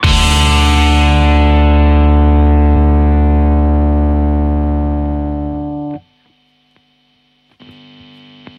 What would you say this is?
Guitar power chord + bass + kick + cymbal hit